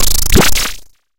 Attack Zound-157
An electronic soundeffect that makes me think of a lasergun. This sound was created using the Waldorf Attack VSTi within Cubase SX.
electronic, soundeffect